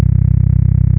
LOW HUM 32 Hz
A low frequency hum that will work on PC speakers (at least it does on my pc).. - I made this sound because I needed a deep hum for a composition, but as it turned out my PC speakers cut off low frequencies. A quick googling taught me that this is common for many PC's, but also that there might be ways around it in terms of designing waves that the speakers can produce. And so, after playing around with audacity abit, I came up with this low frequency hum that works well for my purpose at least. - I hope it might help others with similar issues.
bass, deep, rumble, frequency, pc-speakers